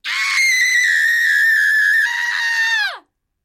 screaming woman horrorIII
Woman screaming (horror)